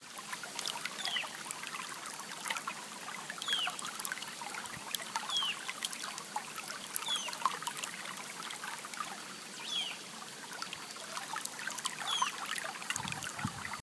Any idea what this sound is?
A recording from my iPhone of a River in the Cordoba´s Sierras in Argentina, you can hear some birds to on the background.
Grabación realizada con mi Iphone de un rio de las Sierras en Argentina, se pueden escuchar algunos pájaros en el fondo.